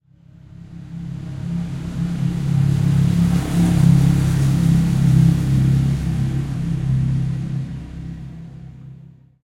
A motorcycle passing by.